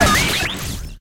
An industrial electronic effect. Created with Metaphysical Function from Native
Instruments. Further edited using Cubase SX and mastered using Wavelab.